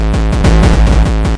Terror Kick 1
gabba, distortion, gabber, hardcore, terror, distorted, kick